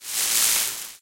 Flying Bug
An insect flying made from rustling leaves in Adobe Audition.
Wings, buzz, Bug, Voando